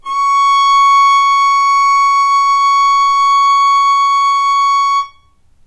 violin arco non vib C#5
violin arco non vibrato
arco, vibrato